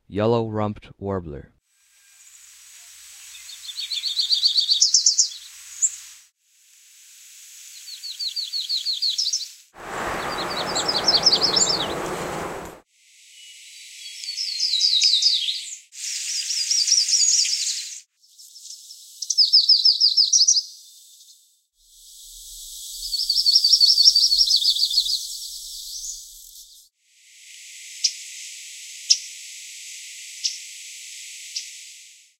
Yellow-RumpedWarbler1
Although the Yellow-Rumped Warbler song may sound like a simple downwards trill, there are so many different types of them. There are a lot of different trills, some actually going up, that show some variability. At the end there are some "Swip"s and "Tuck"s.